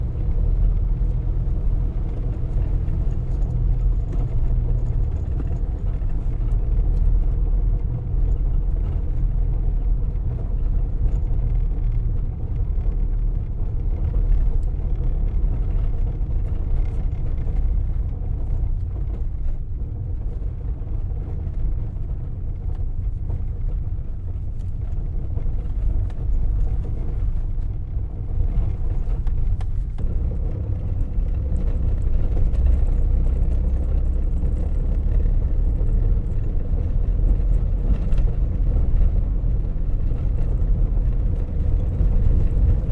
The sound of a small compact car rolling on a bumpy cobblestone road. You can hear some things vibrating and some loose screws. This audio was recorded with a Zoom H5 inside a Susuki Alto in Managua, Nicaragua.
Where did you use it?